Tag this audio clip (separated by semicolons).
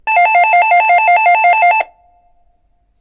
doodle; Doorbell; electric